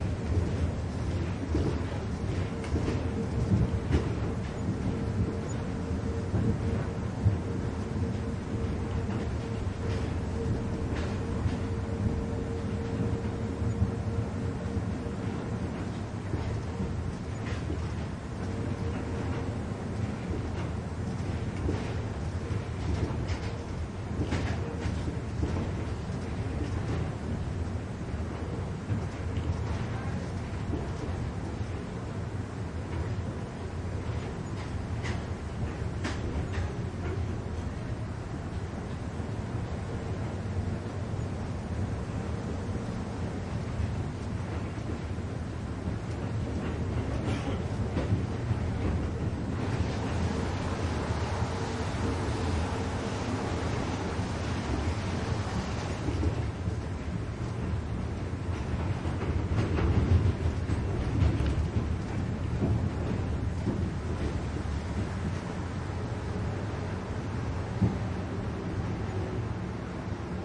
metro 09- MOV LLANTASS SOBRE VIAS
the wheels and movent of the train
city, train, field-recording, spanish